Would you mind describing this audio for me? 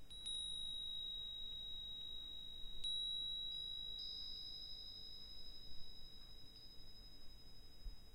light chimes
Tiny glass chimes.
glass, chimes